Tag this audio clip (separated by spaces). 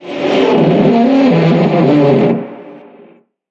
comic creaky game moving moving-crate slapstick-sounds squeak squeaking